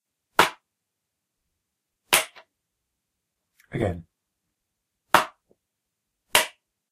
DVD Case Opening & Closing
Incredibly clear quality of a DVD case opening and closing, I used Audacity to remove some of my camera's noise and the result honestly blew me away.
At around 3 seconds in I say "Again", to clarify that I was opening and closing it one more time, edit where needed.